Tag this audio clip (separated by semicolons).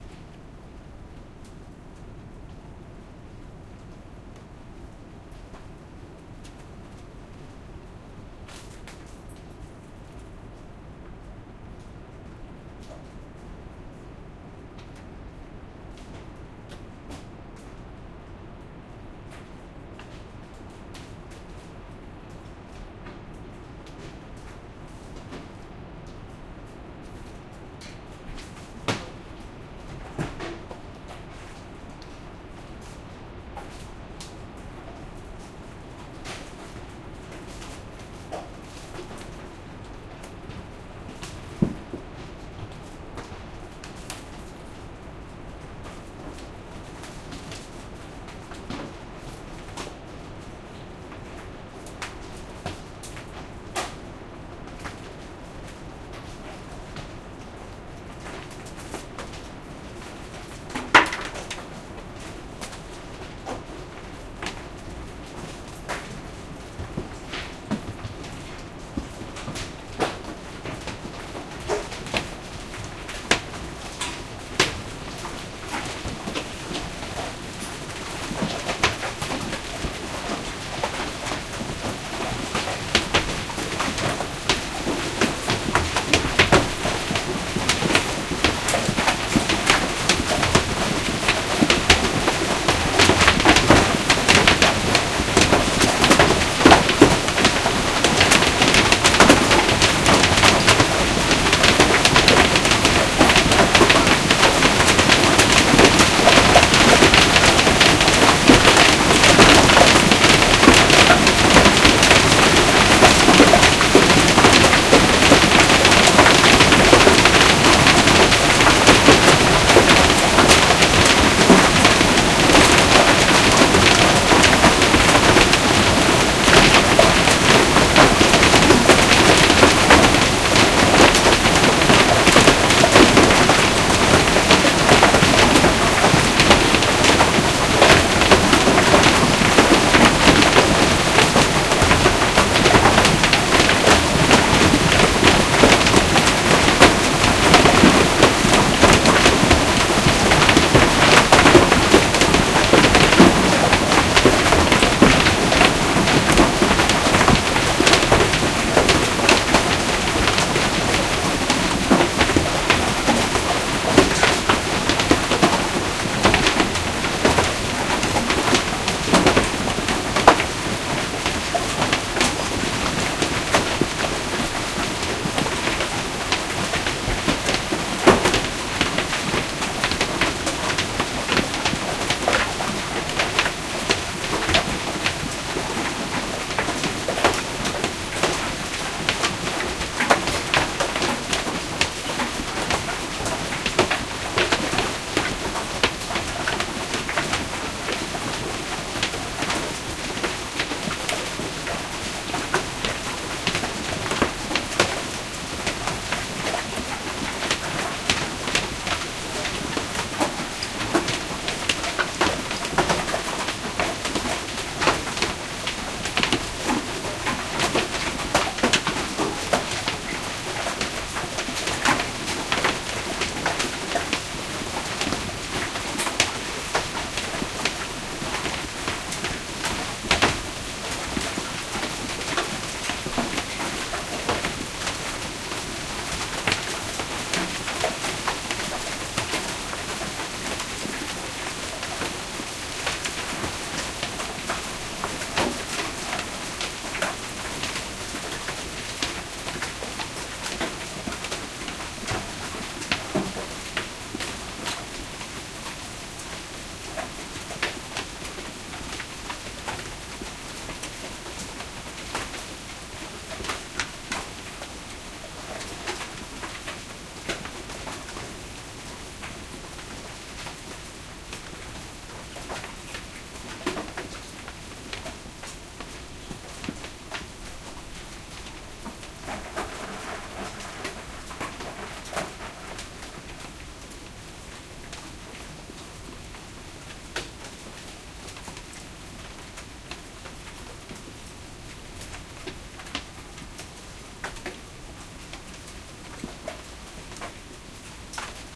environment; field-recording; phonography